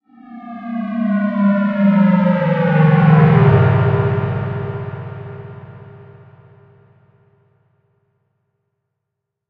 synthesized sound of "dropping" tone.
dropping, sound, synth, synthesis